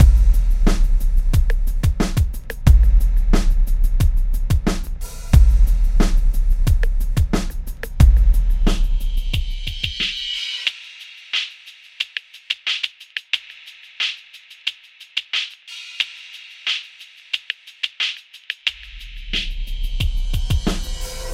90 EQ slide break 1
Having experimented more, I created another break with LFO band frequencies adjusted to create a white noise type break. I then played them simultaneously and mixed one into the other to create the effect of moving the EQ in real time.
This is recorded at 90 bpm for the continuum 4 project. You can visit the thread here:
Here are the sounds used in the break: